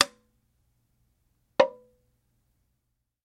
aluminum can 03
Slowly squeezing an aluminum can.